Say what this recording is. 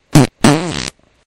abrupt fart 3
fart poot gas flatulence flatulation